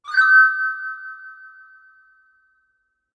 Start Sounds | Free Sound Effects